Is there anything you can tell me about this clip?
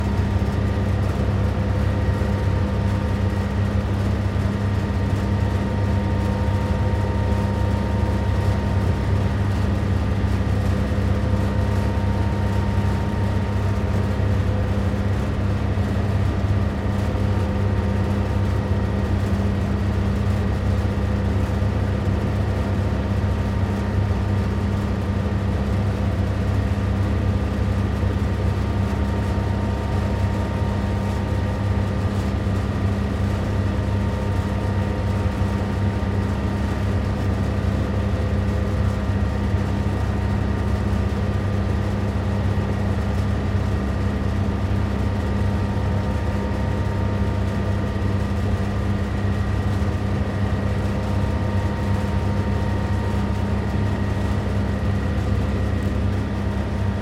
Fan Ventilation Mono 2
Recording made of ventillation i Lillehammer Norway
ambiance, ambient, atmosphere, city, field-recording